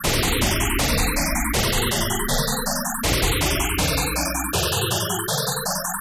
loopstera3stereo
Sequences loops and melodic elements made with image synth.
loop, space, sequence, sound